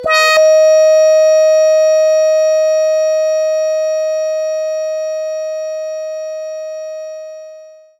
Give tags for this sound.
multisample
organ
ppg
sustained

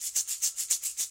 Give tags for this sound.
Home-made
Percussion
Shaker

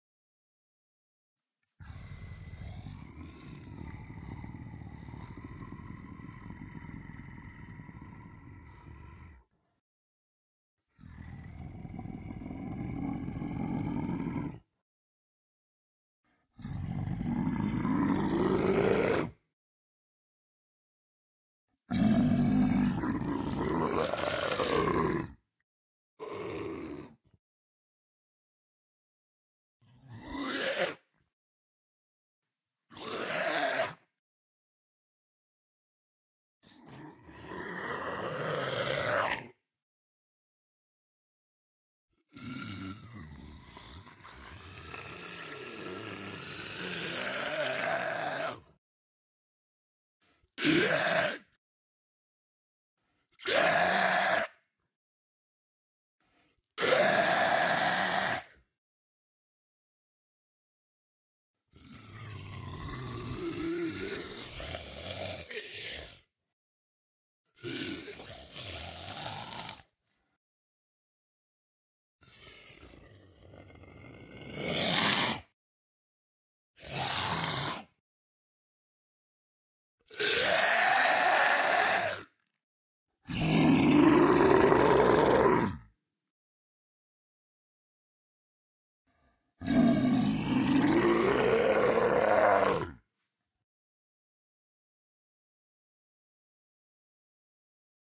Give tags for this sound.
monster
zombie
ghost
horror
scary
yelling
creepy

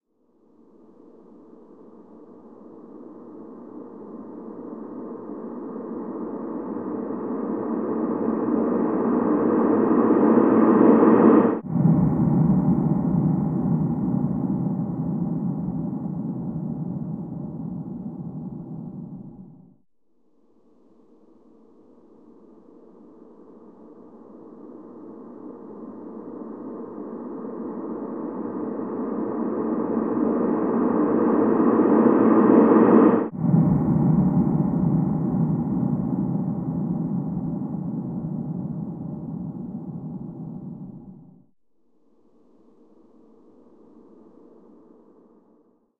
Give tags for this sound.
breath
delay
fx
processed
reverb
scream

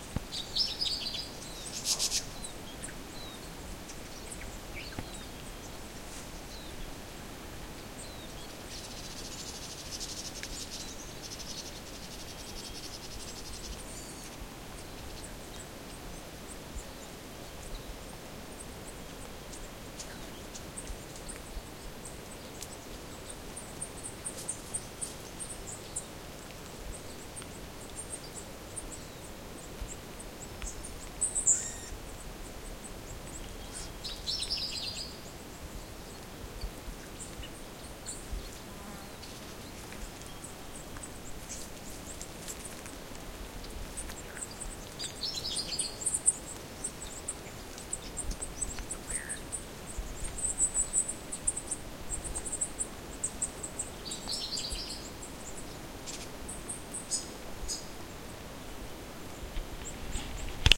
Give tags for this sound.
australia
atmospheric
field-recording
forest
bush
birds
tasmania

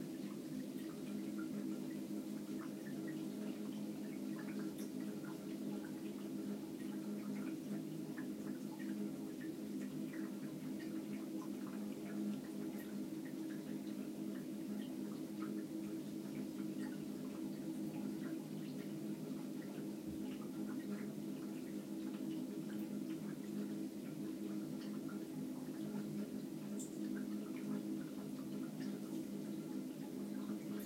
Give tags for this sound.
atmospherics
bathroom
canalization
flow
tapwater
utilities
water

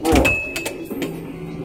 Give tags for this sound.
arcade
pinball
seasideresort
ping
holiday
beach
field-recording
yarmouth
hits
great-yarmouth

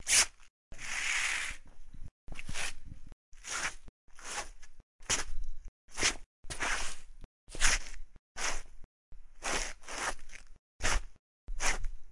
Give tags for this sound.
running
we-ground
stopping
stop
sliding

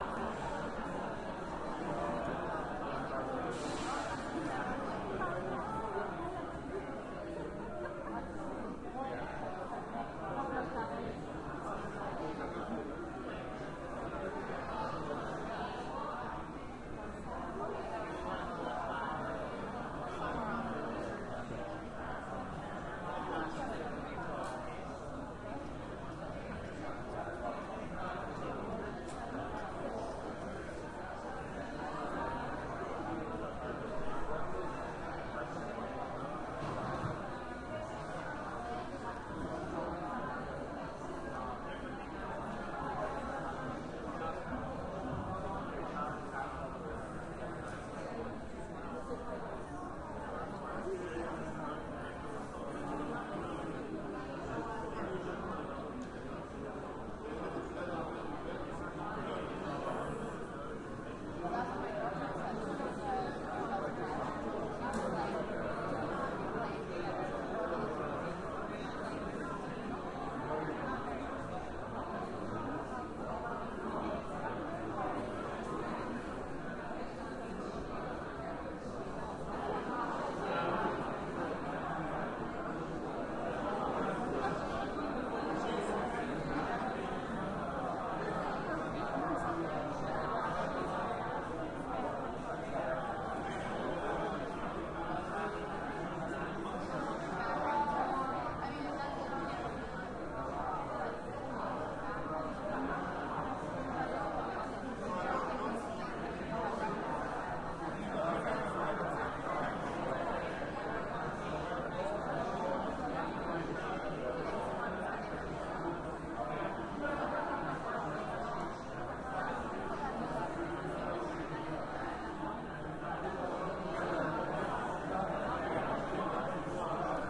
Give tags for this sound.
airport
localization-ambience
field-recording

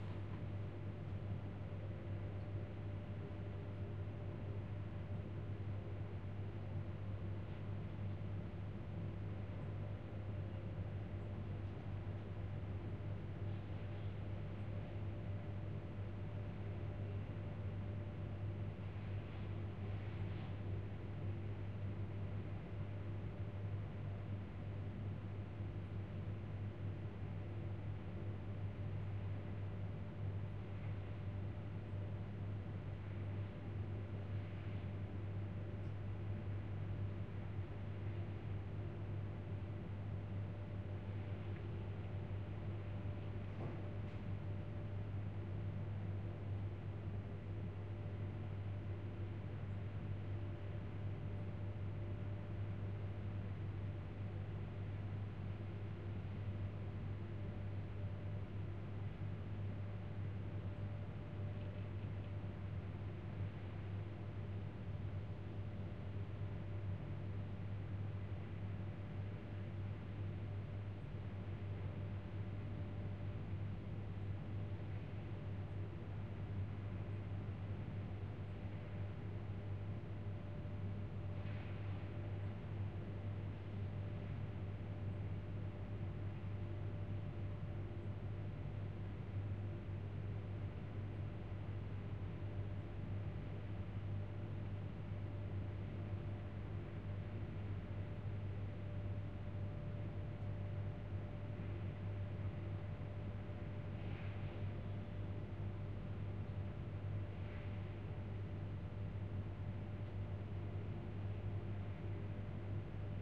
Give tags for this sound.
Office
Tone
Room
Indoors
Industrial
Ambience

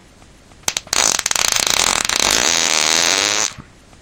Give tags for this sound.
explosion
fart
gas
poot

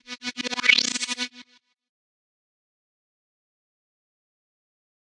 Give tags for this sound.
sample
synth

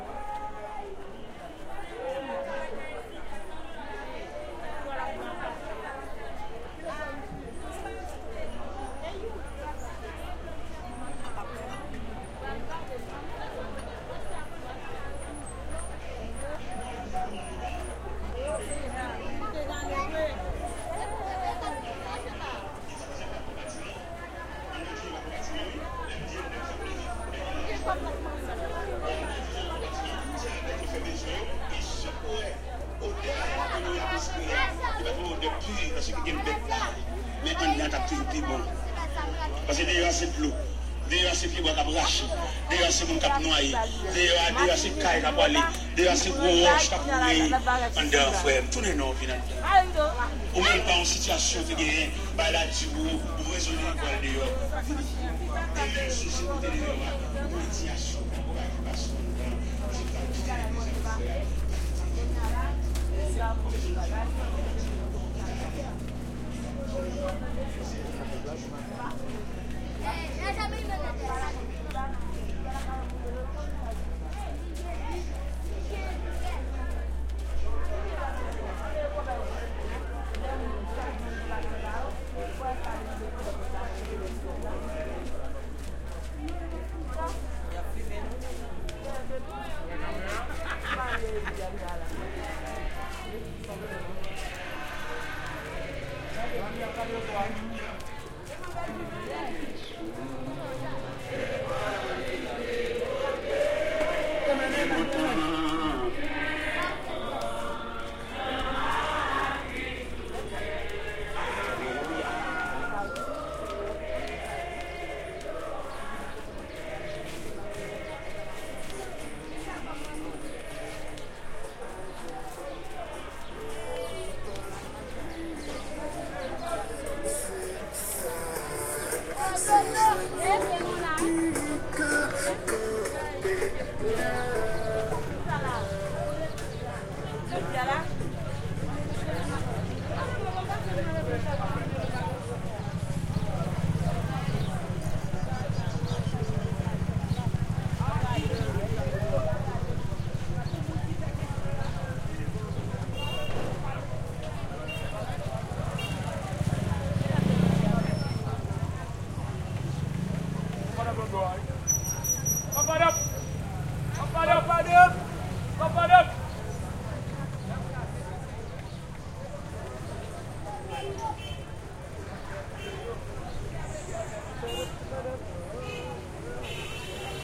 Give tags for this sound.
market
people
steps
Haiti
street
active

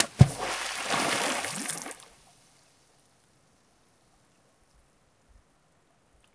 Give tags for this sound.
bloop
percussion
splash
splashing
water

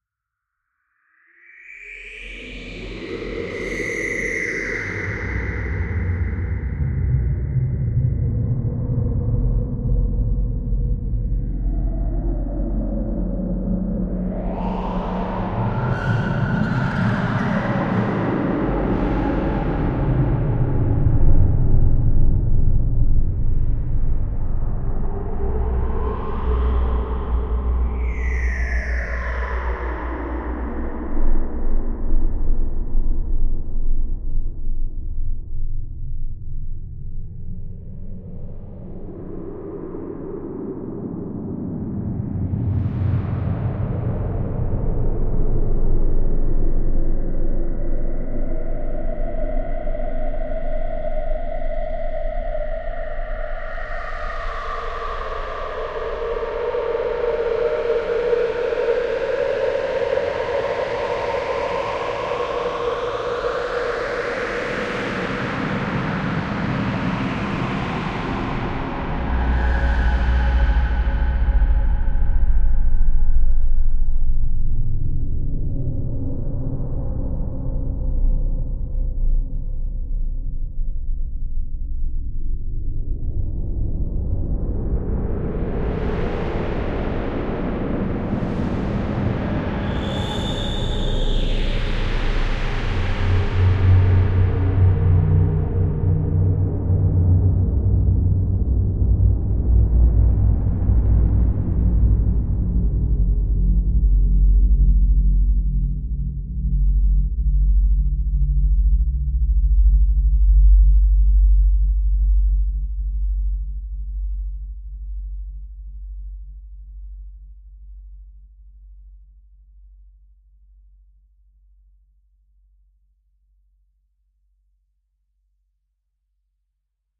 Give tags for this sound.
howling
scraping
sounds
synthesized

